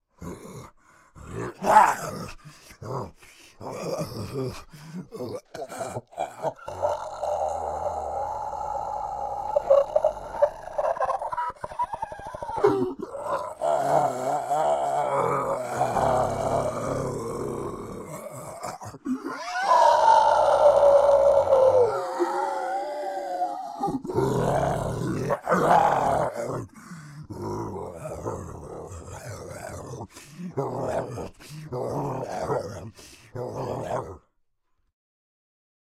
Zombie Monster growl and scream
Zombie sounds with some pitch filters and dehumanisation effects
agony, anger, angry, growl, horror, mad, monster, rage, roar, torment, voice, yell